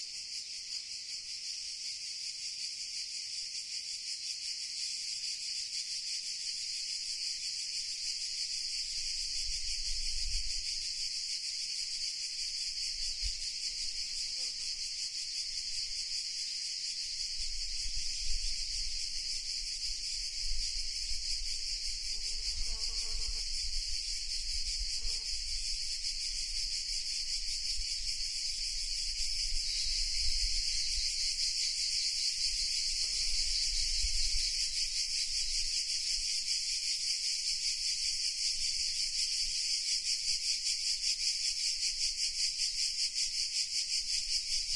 greece naxos cicadas 7
Cicadas happy with themselves near Fotodis monastery in Naxos island (Greece). Some wind can be heard.
white, wind